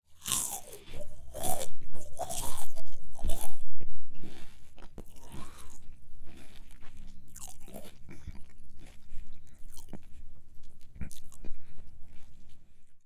snack bite-large
biting and chewing a snack, Recorded w/ m-audio NOVA condenser microphone.
bag, bite, chew, chips, doritos, envoltura, papas, snack